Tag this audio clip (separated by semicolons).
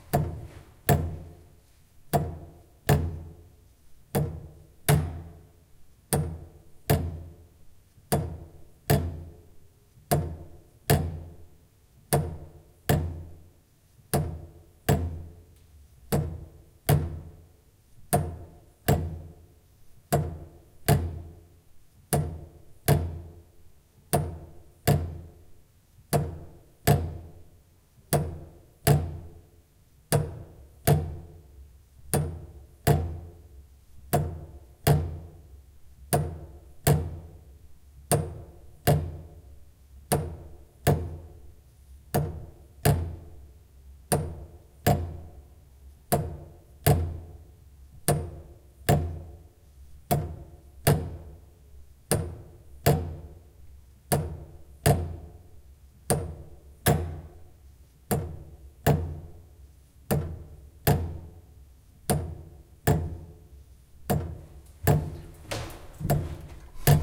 clock; time